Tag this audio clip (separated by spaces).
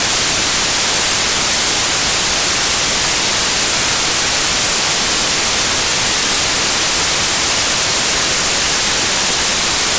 Radio White Noise